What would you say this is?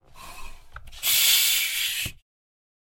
Bicycle Pump - Plastic - Medium Release 01
A bicycle pump recorded with a Zoom H6 and a Beyerdynamic MC740.
Gas, Pressure, Valve